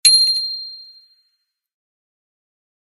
bicycle-bell 15
Just a sample pack of 3-4 different high-pitch bicycle bells being rung.
hit, contact, metal, ring, ping, percussion, strike, glock, bell, bicycle, ting, glockenspiel, ding, clang, bike, metallic